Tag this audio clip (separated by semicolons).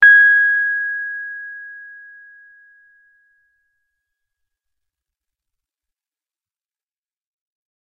piano; tine; tube; fender; rhodes; keyboard; electric; multisample